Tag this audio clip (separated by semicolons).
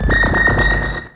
multisample; one-shot; synth